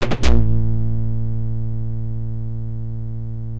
Some Djembe samples distorted
dark, distorted, distortion, drone, experimental, noise, perc, sfx